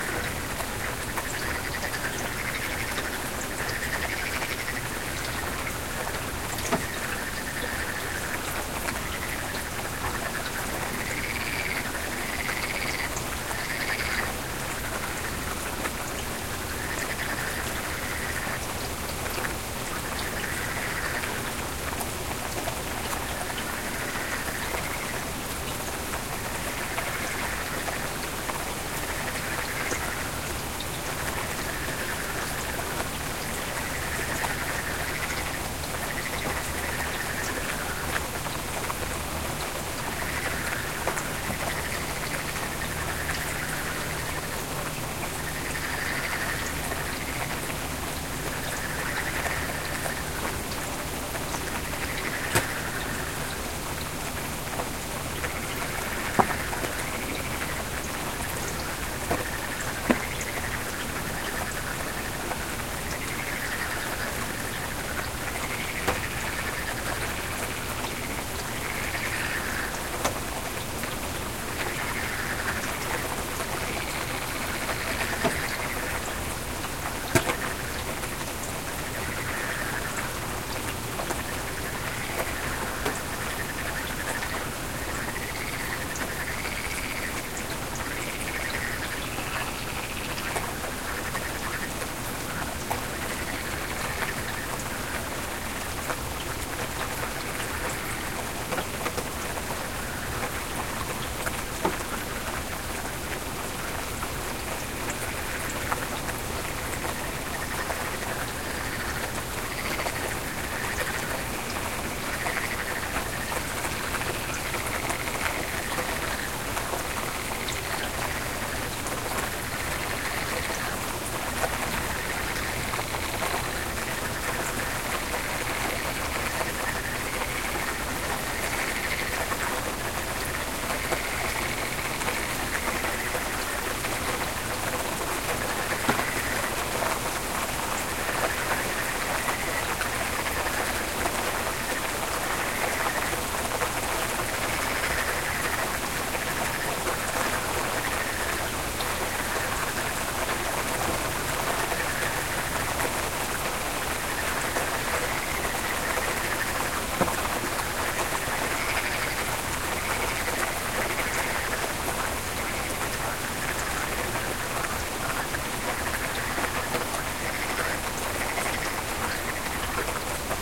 rain frog 210511 0085
Rain at night with frogs in the background